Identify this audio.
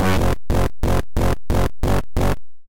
180 Krunchy dub Synths 06
bertilled massive synths